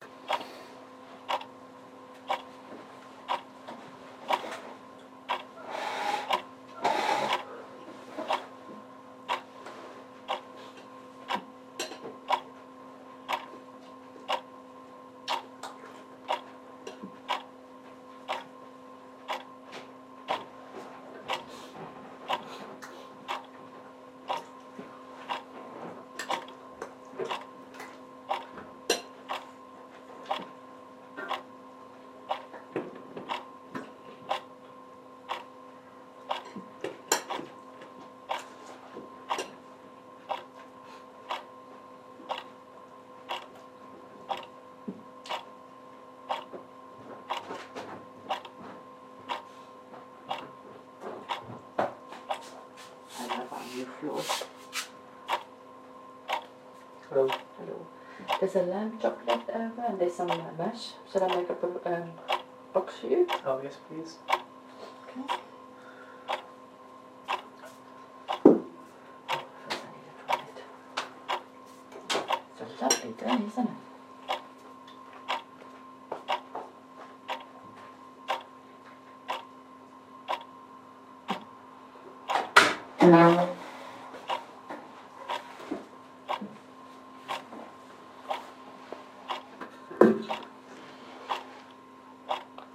A Dictaphone placed right next to a ticking clock with some mild room sounds in the background